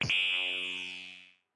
Synth-generated sound of an eletronic device unplugging.
synth, noise, unplug, electro, electronic